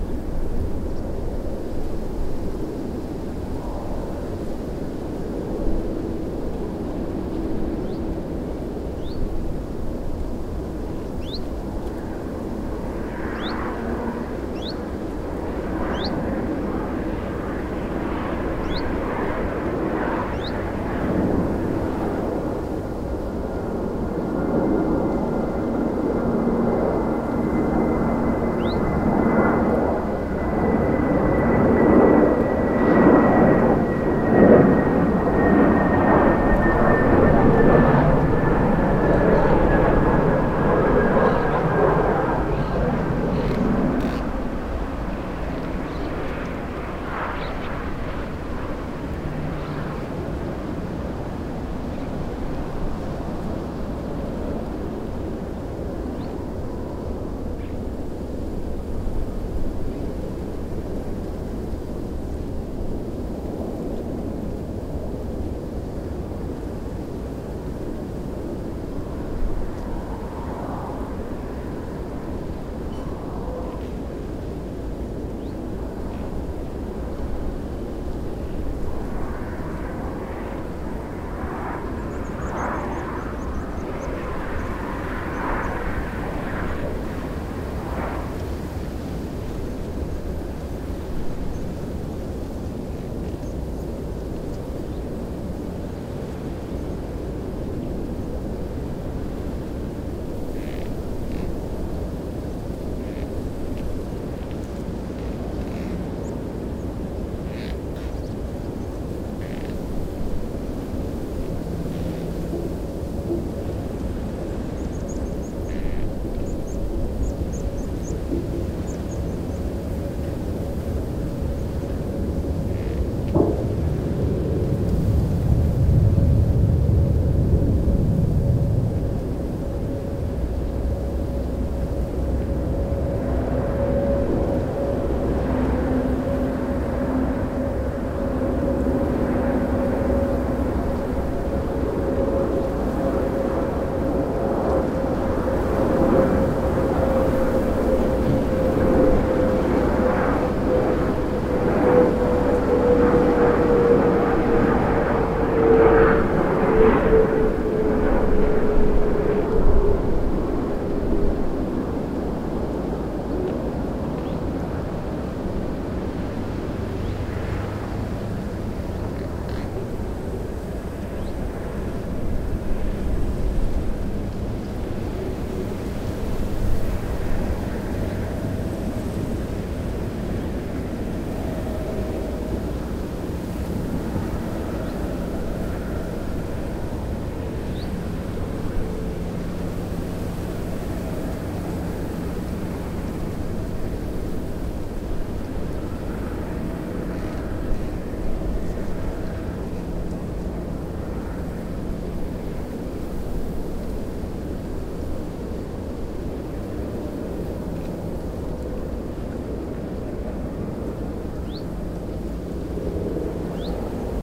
outdoors ambient near airport plane hum
near, airport, ambient, hum, plane